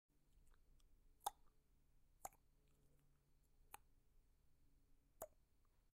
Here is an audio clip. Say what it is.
eyes blinking sound effect OWI
sound recording of me making sounds out of my mouth to create the effect of an animated characters eyes blinking
blinking, mouth